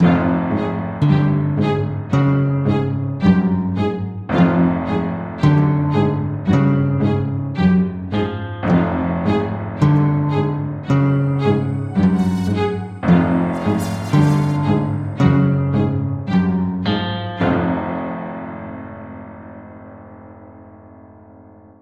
A short tune I made in GarageBand for something called Victors Crypt. I wanted to make the ground with "organic" instruments like violin, acoustic guitar and piano. But I also added a synth to get an atmosphere and spook things up. I think it could be good for something mysterious and a bit eerie. Anyway hope you like it